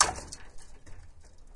Somewhere in the fields in belgium we found a big container with a layer of ice. We broke the ice and recorded the cracking sounds. This is one of a pack of isolated crack sounds, very percussive in nature.